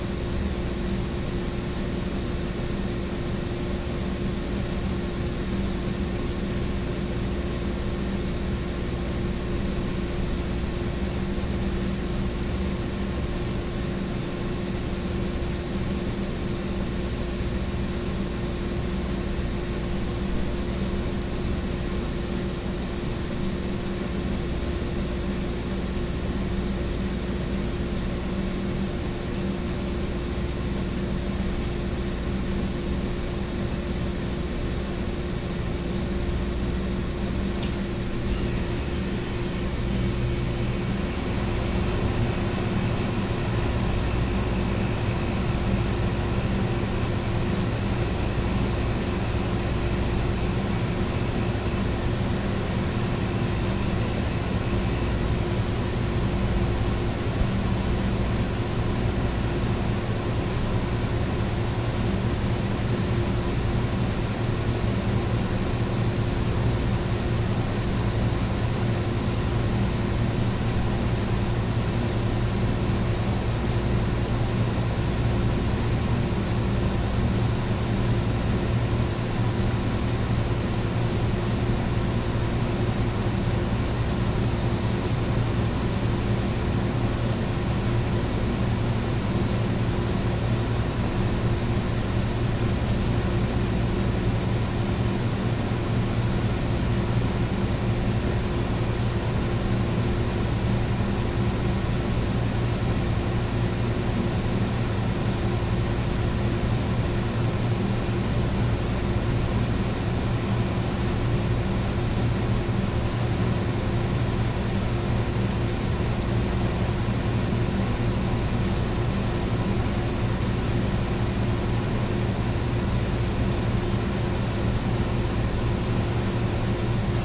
vent air conditioner int nearby +changes
air, int, vent, conditioner